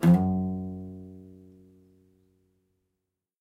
F#2 Guitar Acoustic Slide/Mediator
F#2 played on an acoustic guitar with a slide technique on the left hand and with a mediator for the right hand.
Recorded with a Zoom h2n
mediator, slide, oneshot, nylon-guitar, guitar, asp-course, single-notes, acoustic